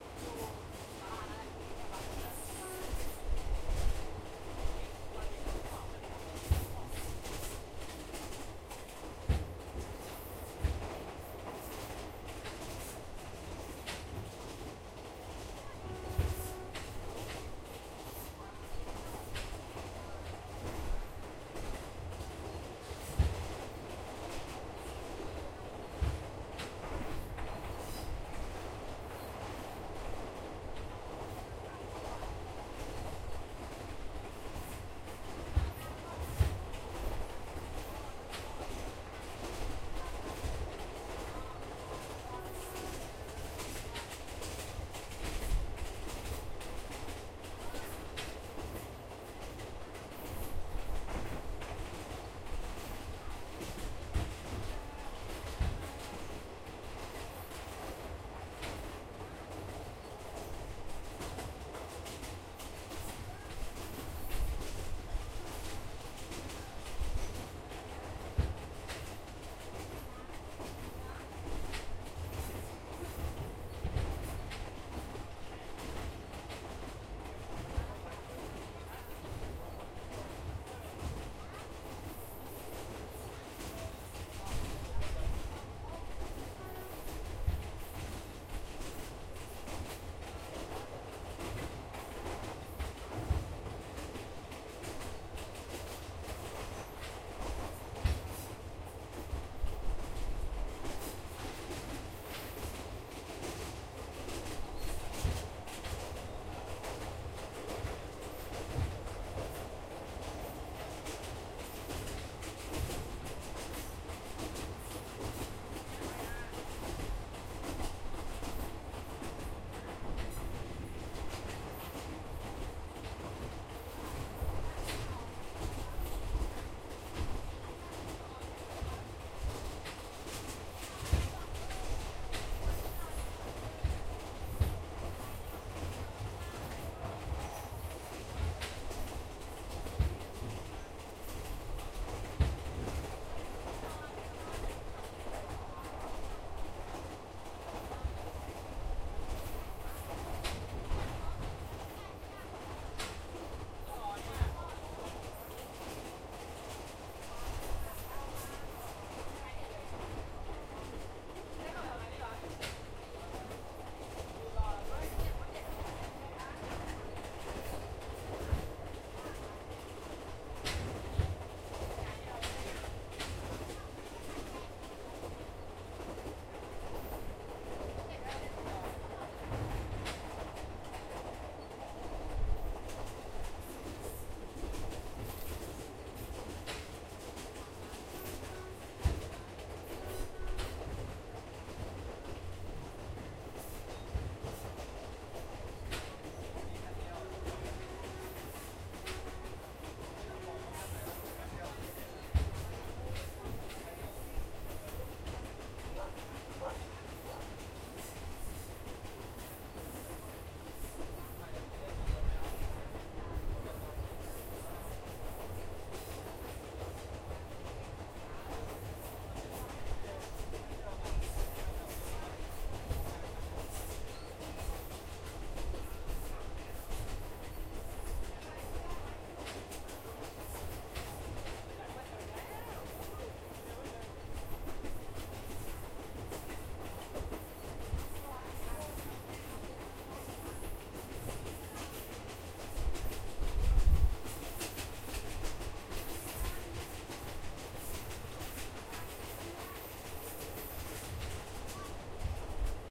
Train from Kanchanaburi to Bangkok, Thailand
In the train.
Recorded the 28/11/2013, at 4:10 pm.
Recorder lying on a seat.
locomotive, passenger-train, rail, riding, train, vibrations, wheels